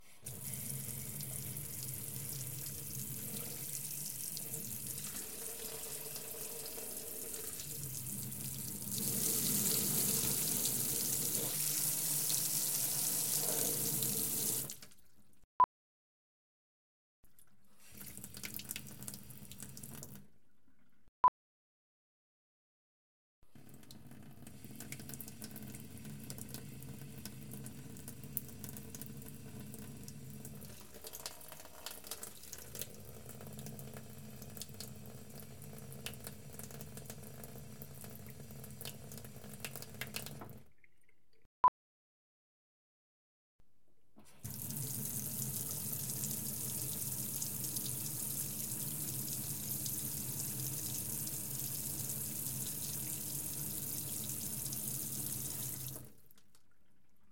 Running water from tap
catering, stereo, tap, water